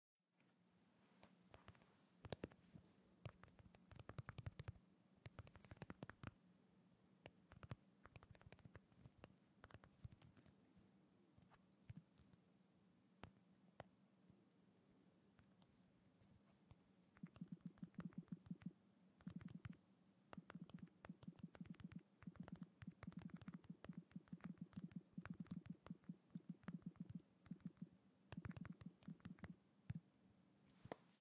Smartphone Touchscreen Tapping, Texting or Messaging, Gaming
Clean, dry recording of a smartphone touchscreen being rapidly tapped as if a message was being written on it. Could just as easily be used as sounds from using (writing, playing mobile games, browsing, etc) a tablet or really any relatively small touchscreen device. Tapping speed and length between taps varies throughout the recording, but overall I tried to make it sound as natural as possible while still offering options for more granular placement.
Microphone was positioned 5-6 inches away from source. A 120Hz high-pass filter was applied to remove unnecessarily pronounced bass frequencies.
touch, quick, gentle, phone, tapping, fast, consumer, android, tap, quiet, text, cellphone, glass, thumbs, home, send, smartphone, mobile, using, messenger, close, screen, foley, iphone, domestic, touchscreen, telephone, imessage, use